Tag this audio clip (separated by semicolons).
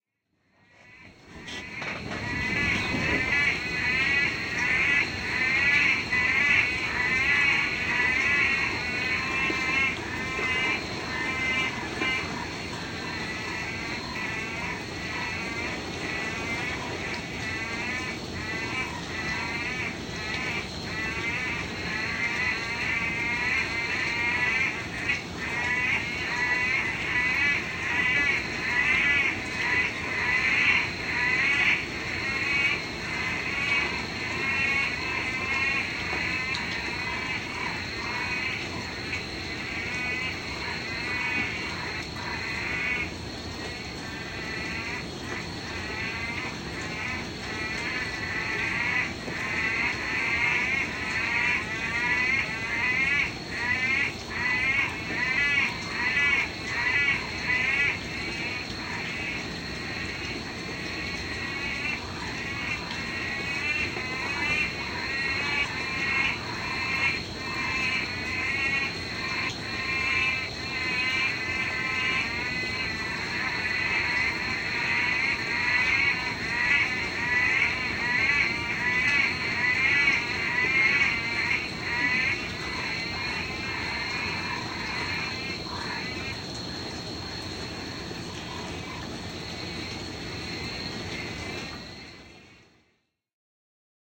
beach; frogs; loud; Queensland; rainforest; strage